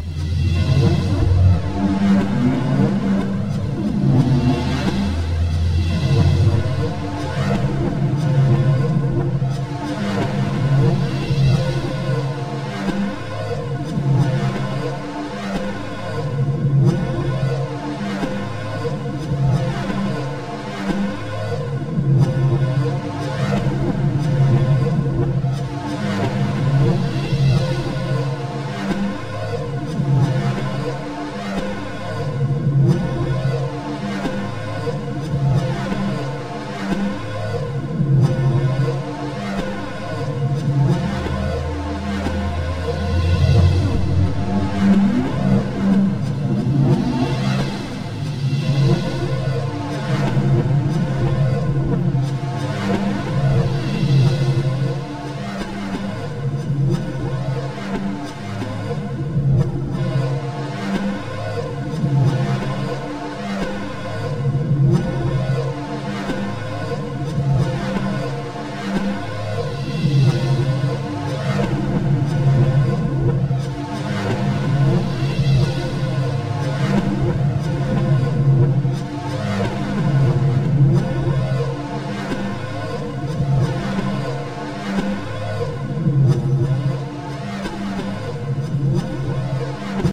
HV-longtrainidea
This is not a friendly train, maybe a train of war with dangerous cargo.
Made with Nlog PolySynth and B-step sequencer, recorded with Audio HiJack, edited with WavePad, all on a Mac Pro.